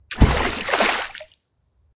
Remix of Ploppy 1 to 4, with added bass for a more satisfying 'plop!'
field-recording, foley, fx, plop, rock, splash, stereo, throw, water, wet